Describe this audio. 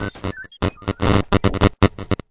I have tried to (re)produce some 'classic' glitches with all sort of noises (synthetic, mechanic, crashes, statics) they have been discards during previous editings recovered, re-treated and re-arranged in some musical (?) way because what someone throws away for others can be a treasure [this sound is part of a pack of 20 different samples]
click, feedback, hi-tech, synth, electric, digital, static, contemporary, glitch